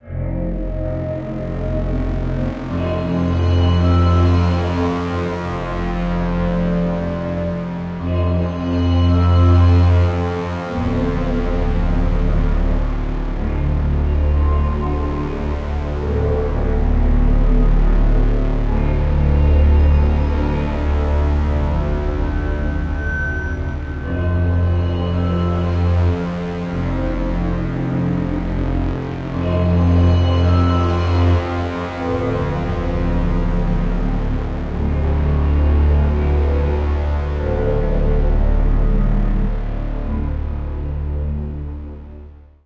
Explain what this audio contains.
The Abyss
A deep, reverbating sound with a touch of horror and suspense.
atmosphere, bass, cinematic, dark, deep, film, heavy, horror, low, movie, pad, scary, sci-fi, soundtrack, space, suspense, synth